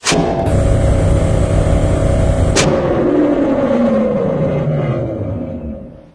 Electric motor engine start stop
electric, engine, motor, start, stop